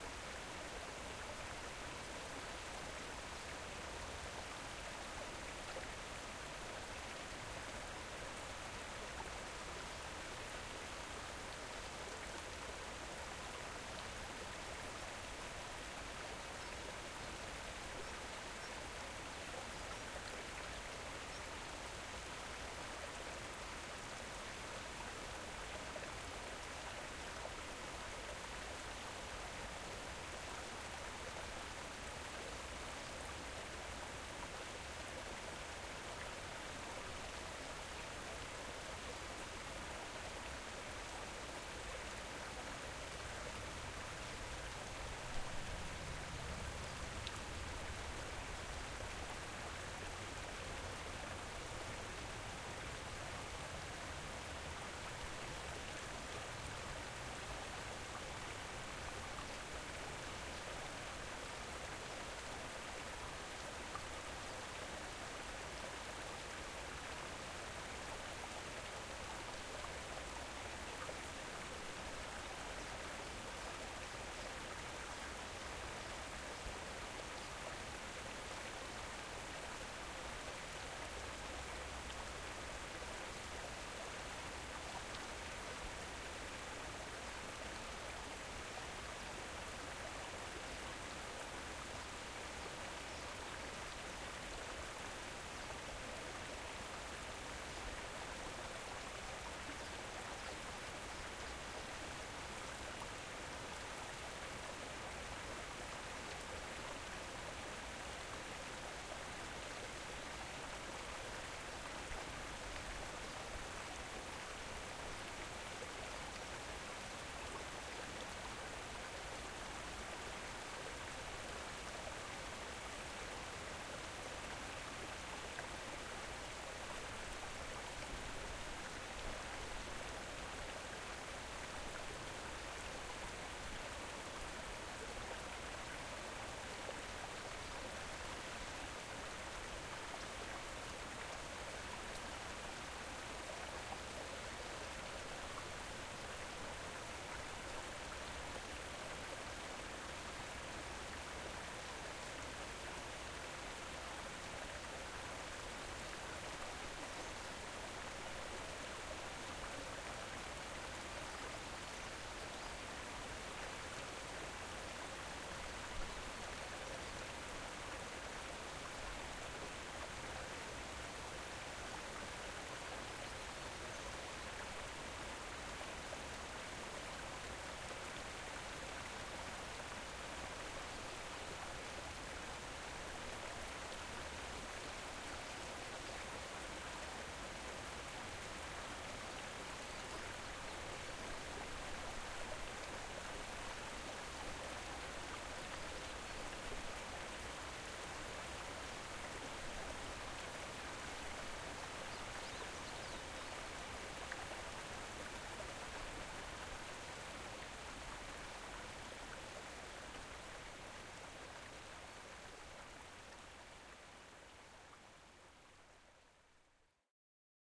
Waterfall with nature surrounding ambience
The sound of a waterfall in a big space, like a cave.
ambiance, ambience, ambient, atmosphere, background, background-sound, beautfiul, beautiful, birds, calming, echo, OWI, slight, soundscape, water, waterfall